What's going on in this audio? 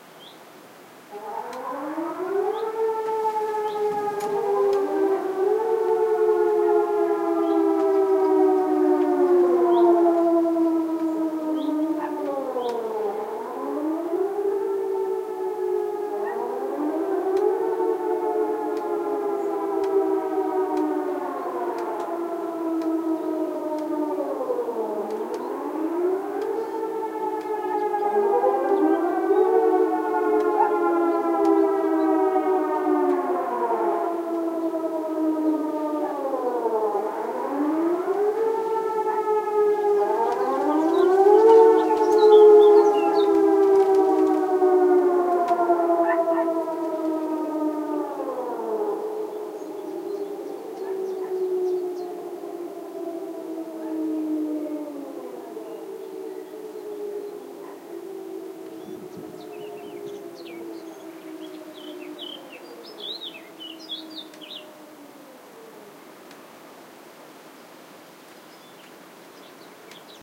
Warning Siren 1 Filtered (06 May 15)
Every year, at midday on the 1st Wednesday in May, 1,078 air raid sirens across Denmark are tested. There are 3 signals, but in 2015 I only managed to record the last 2:
12:00: "Go inside"
12:04: "Go inside"
12:08: "Danger is passed"
air-raid, field-recording, siren, test, warning